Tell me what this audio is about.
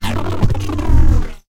think quiker
fx, weird